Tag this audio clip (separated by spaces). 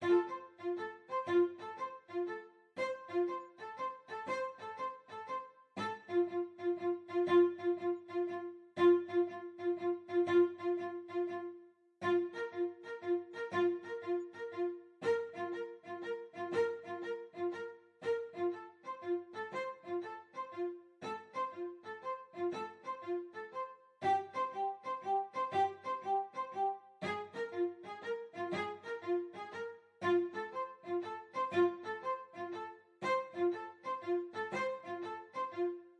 120 Chord rythm beat HearHear Strings Fa